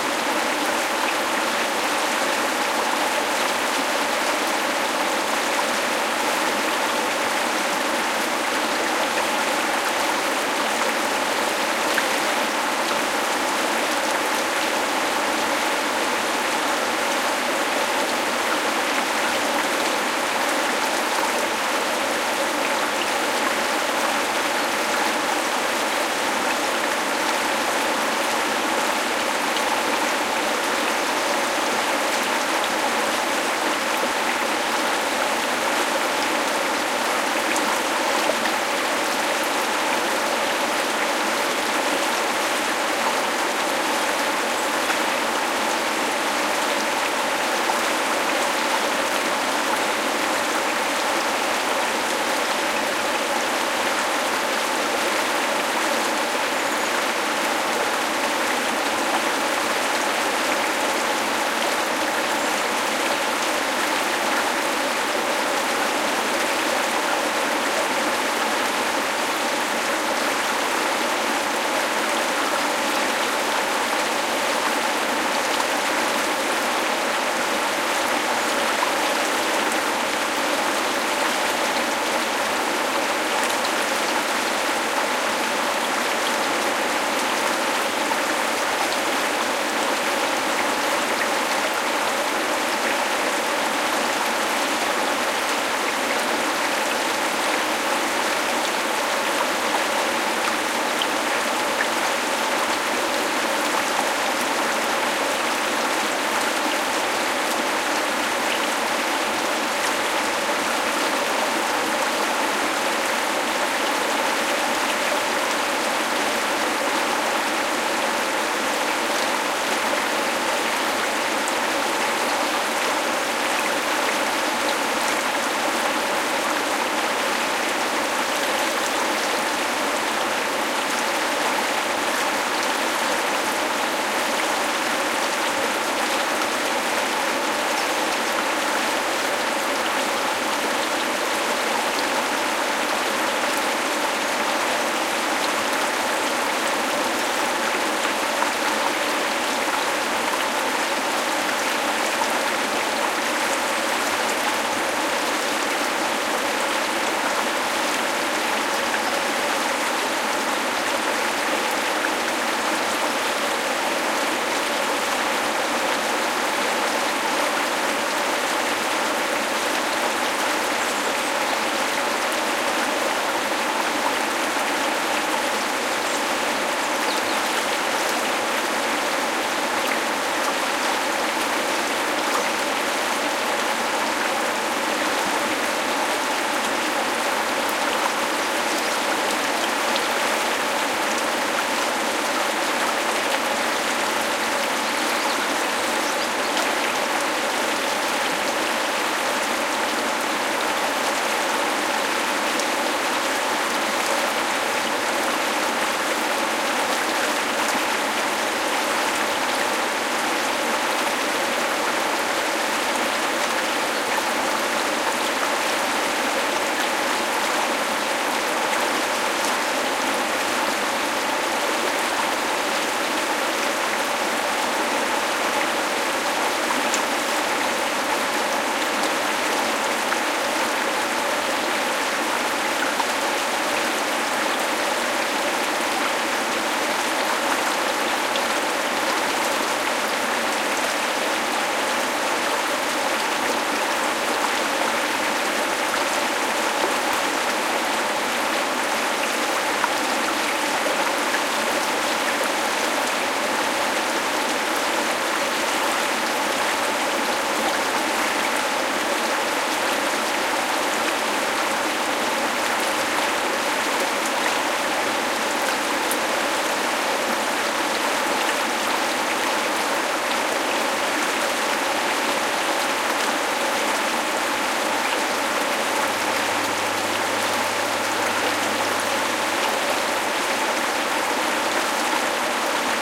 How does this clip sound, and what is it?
close take of a small river. Shure WL183 mics into Fel preamp and Olympus LS10 recorder. Recorded at Rio Castril, Granada, S Spain